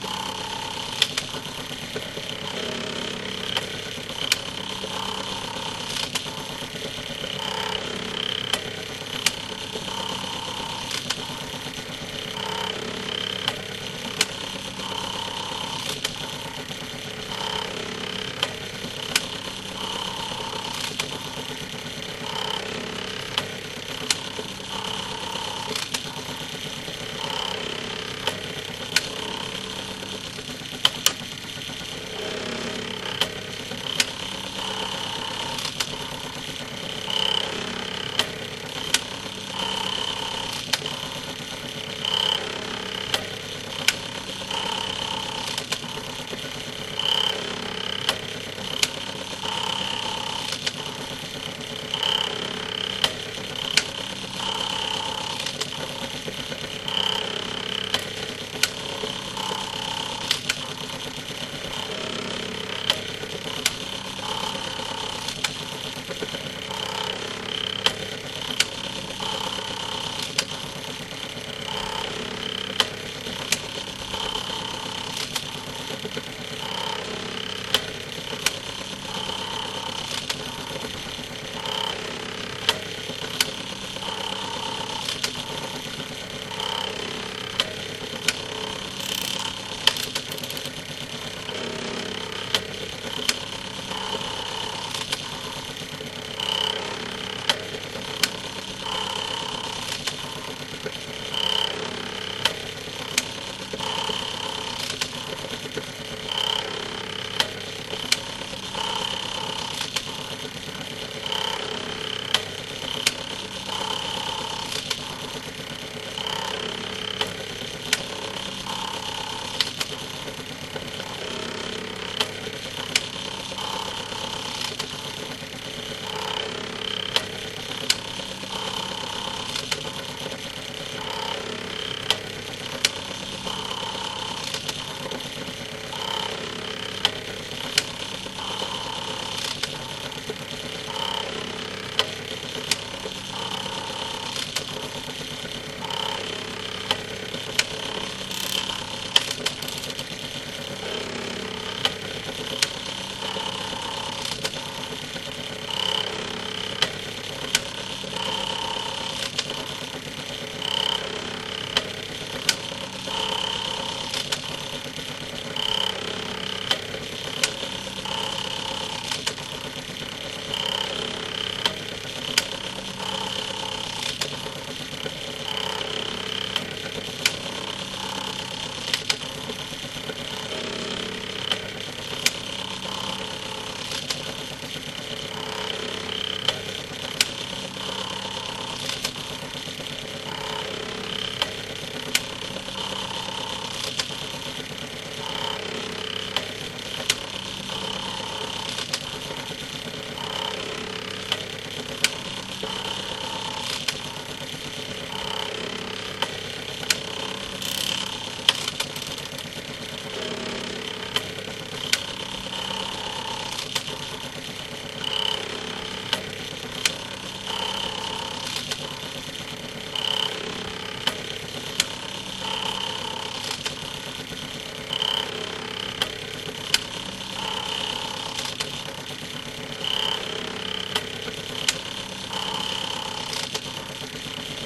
The sound of a dishwasher dial turning as it nears the end of its cycle.
Dishwasher, Rhythmic, Machine, Dial, Automatic